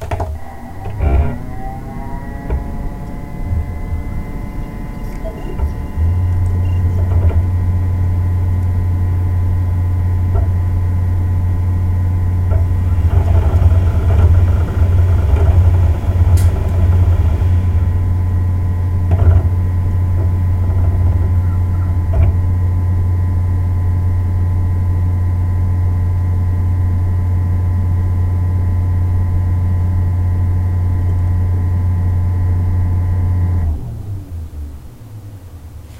Xbox 360 Start & Stop (without start sound)
Just recorded my Xbox 360 from 2008 starting up and shutting down.
I record sounds of things, because I like their sound. Go ahead and use those.
dvd, 360, up, hdd, computer, shut, xbox360, startup, sound, spinning, start, shutdown, xbox, down, console